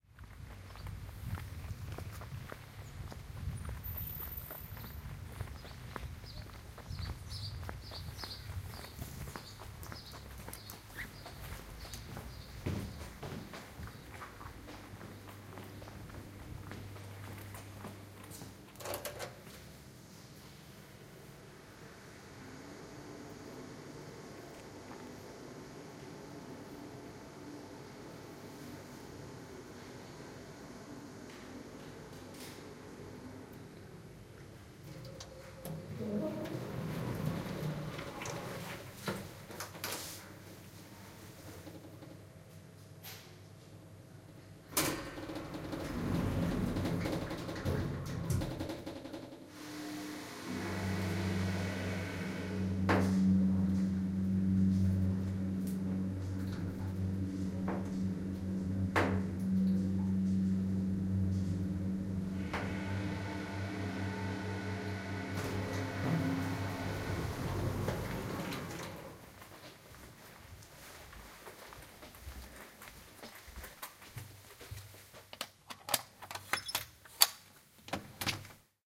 Aalen Hotel Lift Ride
This is a recording of walking from the car-park of the Ramada Treff (Aalen Germany) into the lobby, lift then entering my room.